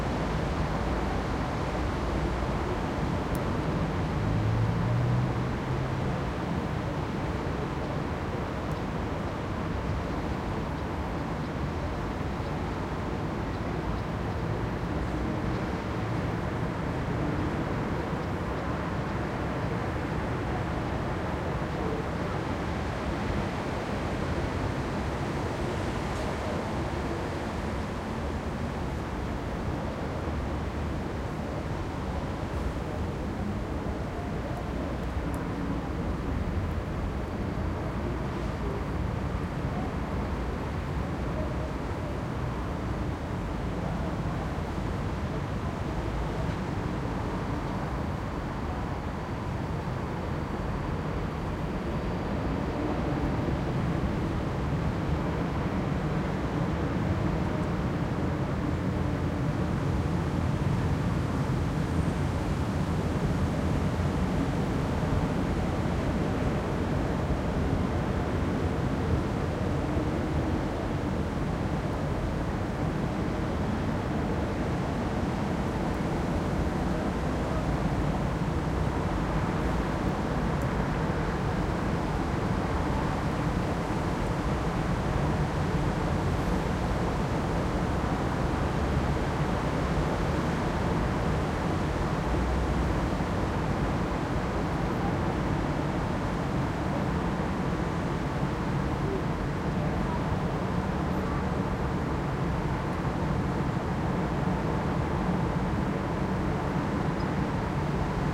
140812 Vienna SummerEveningWA R
Wide range 4ch surround recording of the summer evening cityscape in Vienna/Austria in the 13th district by Schönbrunn Castle. The recorder is positioned approx. 25m above street level, providing a richly textured european urban backdrop.
Recording conducted with a Zoom H2.
These are the REAR channels, mics set to 120° dispersion.
street, scooter, surround, urban, field-recording, city, Europe, evening, tram, wide, cars, Austria, traffic, Vienna, Wien